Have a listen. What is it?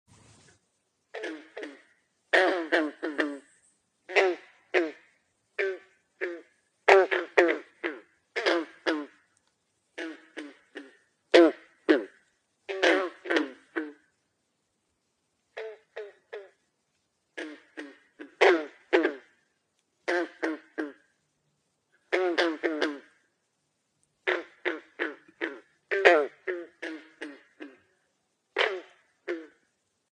Green frog, croaking
Green frog croaking
croak, frog, green, ribbit